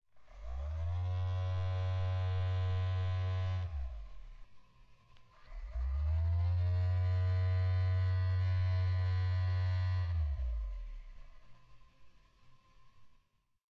Phone Vibration Slowed Down
Two slowed down samples of a cell phone on vibrate (taken from previously uploaded sound "phone vibrate". Now sounds more like an engine starting up and subsiding...or a really lame lawnmower.
MTC500-M002-s13, buzz, up, slow